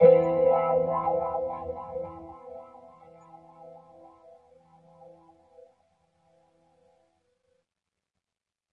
Special jazz guitar chord edited in "wahwah" effect by Guitar Rig 3
giutar, funk, guitars, gitar, wah, jazz, chord